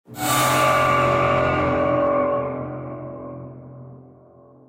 Saw Cutting 2
Another saw synthetically made for cutting
anxious, bass, bones, breathe, circular, creepy, cut, cutting, drama, flesh, ghost, haunted, horror, phantom, saw, scary, sinister, spooky, stress, tension, terrifying, terror, thrill